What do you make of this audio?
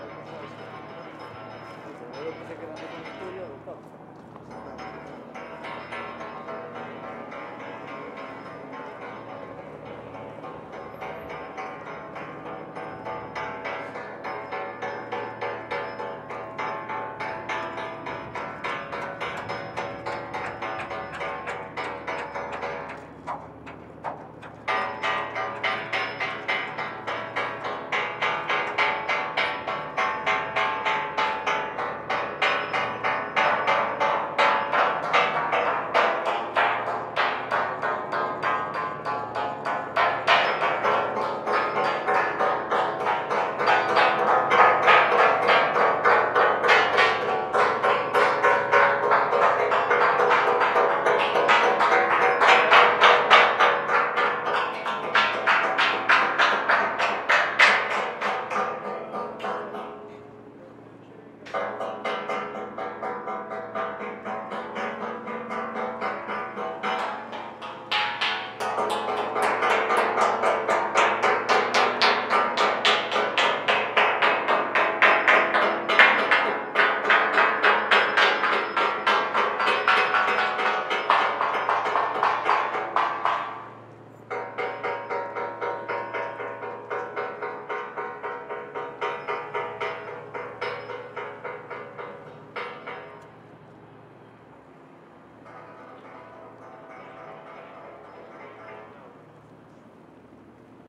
Ambiente - golpes de obra 2
Environment from workers arranging things...
MONO reccorded with Sennheiser 416
beats, workers